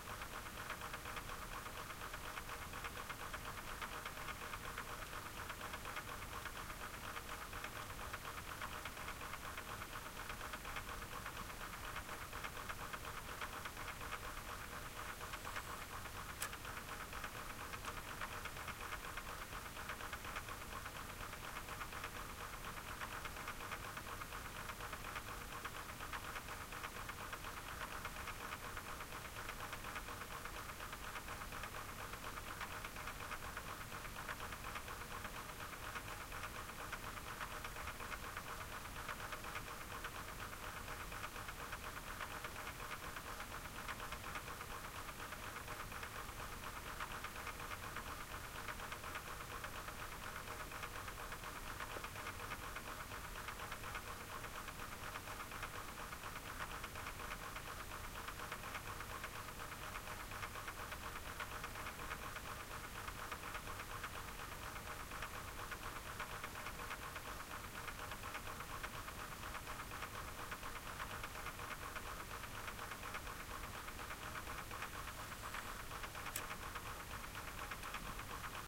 Clock FastTicking
Recorder: SONY MD MZ-RH1 (Linear PCM; Rec level: manual 19)
Mic: SONY ECM CS10 (Phantom powered; Position: Close to clock)
This is the recording of a mechanical clock with its fast paced ticking.
The recording is slightly longer than a minute and contains two extra 'ticks' of the minute change. The digits are dials that are rotated 'digitally' and the sound of the tens and hours is much stronger than the single minute dial.
The sound of the ticking is louder than a typical hand clock, but goes unnoticed while talking or doing normal daily actions.
clock, mechanical, ticking